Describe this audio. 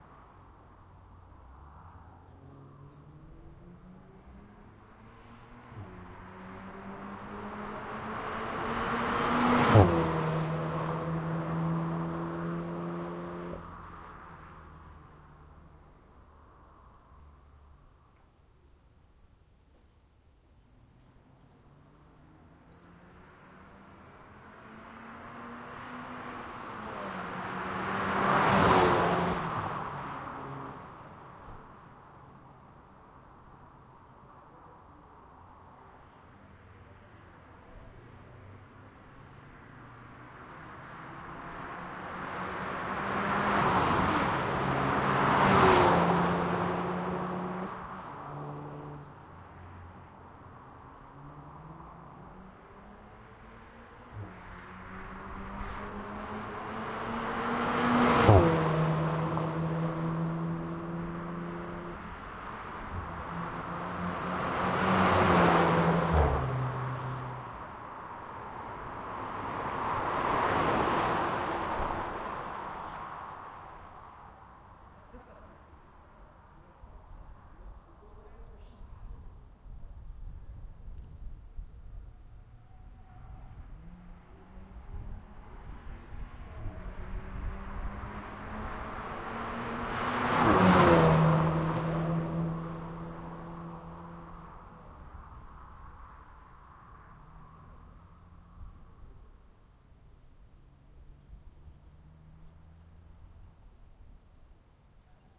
VW Golf GTI Drive By (Zoom H2n M&S)
VW Golf GTI driving by. Recorded with Zoom H2n Midside.
car, race, vw, driving, drive, gti, golf, by, racetrack